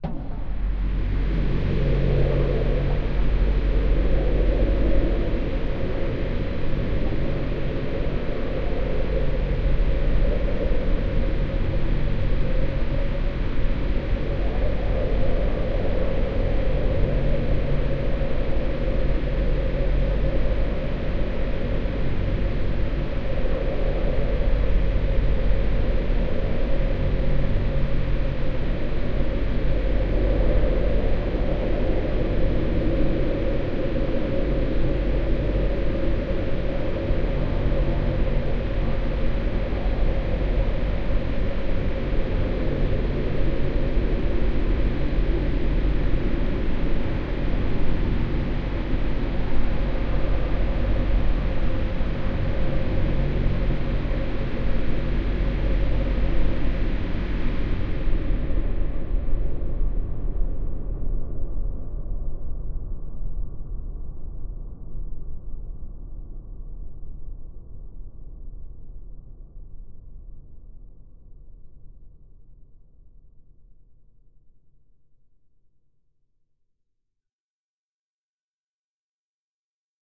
LAYERS 006 - Chrunched Church Organ Drone Pad - F#1
LAYERS 006 - Chrunched Church Organ Drone Pad is an extensive multisample package containing 97 samples covering C0 till C8. The key name is included in the sample name. The sound of Chrunched Church Organ Drone Pad is mainly already in the name: an ambient organ drone sound with some interesting movement and harmonies that can be played as a PAD sound in your favourite sampler. It was created using NI Kontakt 3 as well as some soft synths (Karma Synth) within Cubase and a lot of convolution (Voxengo's Pristine Space is my favourite) and other reverbs as well as NI Spectral Delay.
artificial, drone, multisample, organ, pad, soundscape